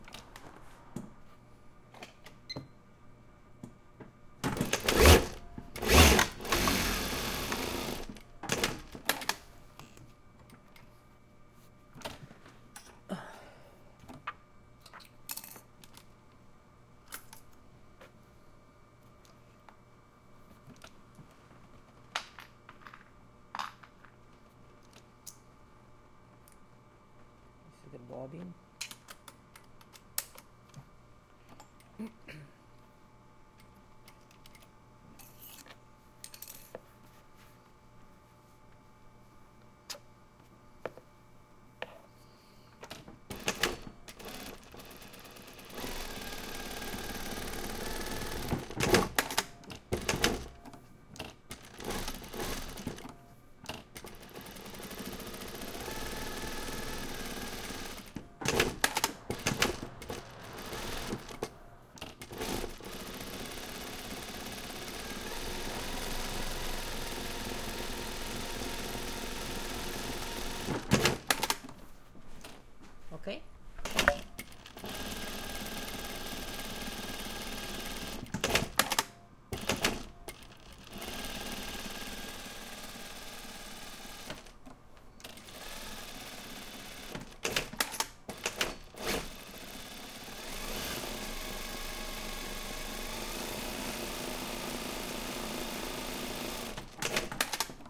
Recorded at Suzana's lovely studio, her machines and miscellaneous sounds from her workspace.